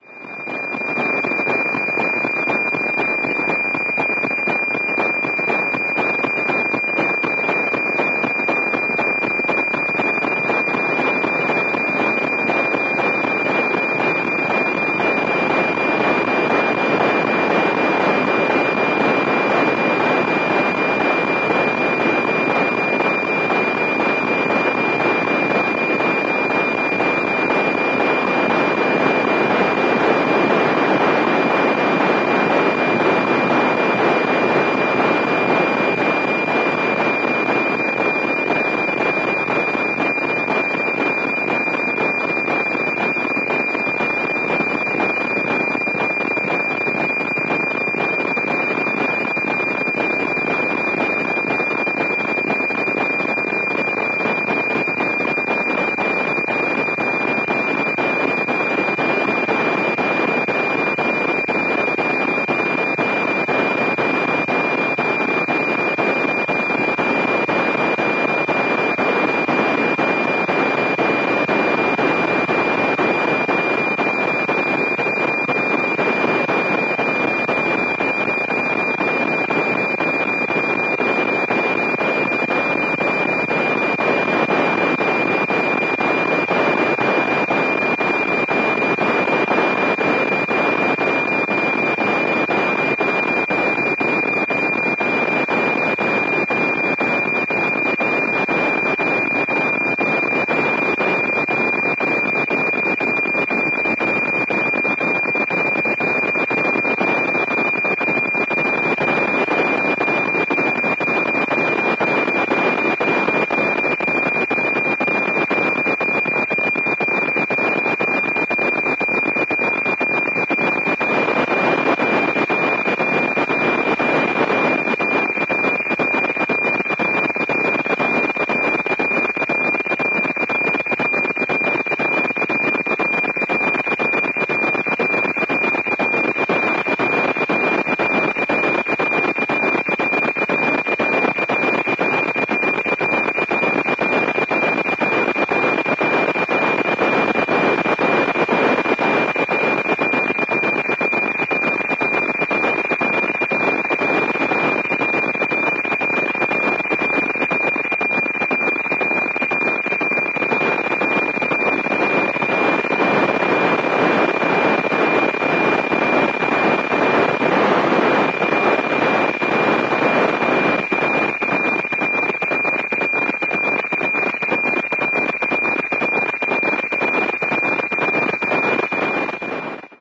An excerpt of weather map digital data transmission from NOAA-19 satellite. The recording was done by using the ICOM IC-R20 scanner connected to discone antenna.